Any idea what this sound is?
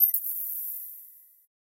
Bright digital GUI/HUD sound effect created for use in video game menus or digital sound application. Created with Xfer Serum in Reaper, using VSTs: Orbit Transient Designer, Parallel Dynamic EQ, Stillwell Bombardier Compressor, and TAL-4 Reverb.
application, artificial, automation, bleep, blip, bloop, bright, click, clicks, command, computer, data, digital, effect, electronic, game, gui, hud, interface, machine, noise, pitch, serum, sfx, short, sound-design, synth, synthesizer, windows